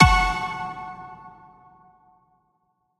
Tonal Impact F#
Tonal impact hitting the note F#.
ableton, alternative, drone, electronic, hip-hop, impact, layered, processed, tonal